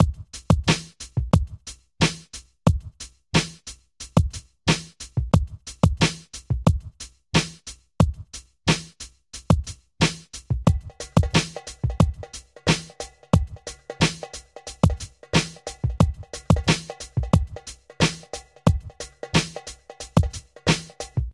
hunter 90bpm
90 bpm oldskoolish pretty sharp hiphop beat with percussions, done by me around 2001.
breakbeat, fat, hiphop